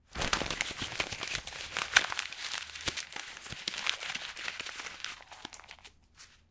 Paper Crumple
crumpling a piece of paper